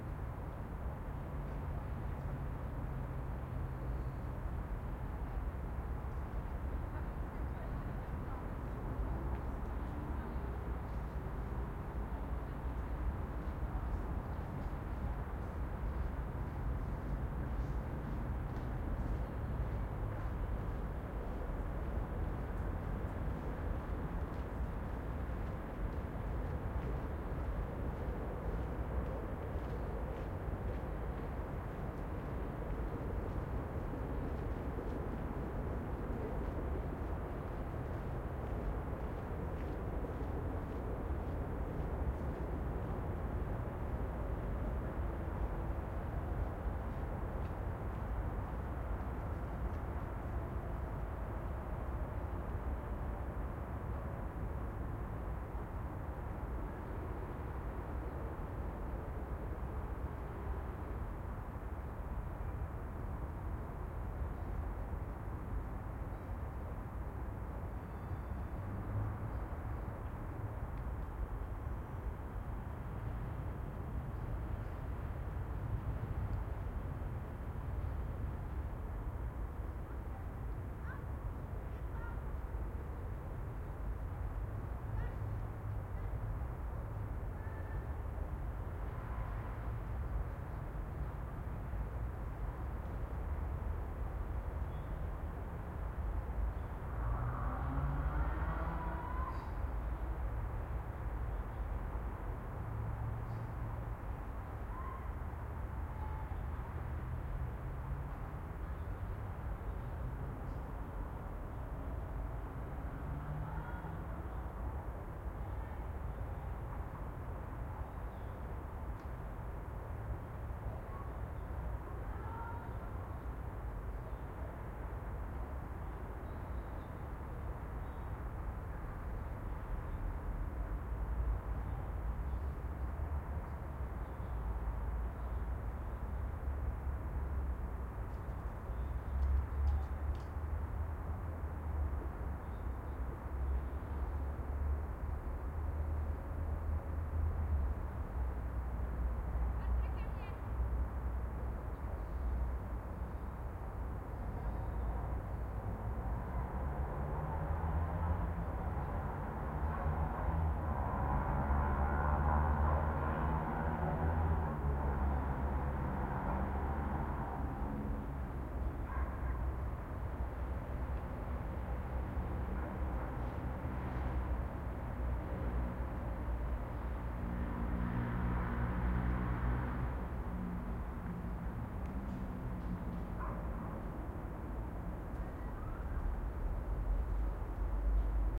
City park 1(traffic, cars, people, voices, shouting)
Night city park ambience with traffic and people.
cars, city, night, park, people, traffic